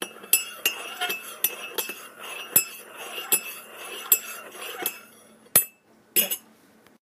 Stirring tea
mug, tea, ceramic, stirring